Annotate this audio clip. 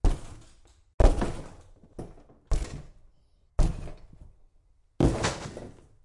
kick cardboard box
Recorded with a Sony pcm-m10. Me hitting a cardboard box in my kitchen. Processed in ableton live with eq, red-17, kramer tape, vitamin, and L2.
cardboard, crunch, hit, impact, kick, pcm-m10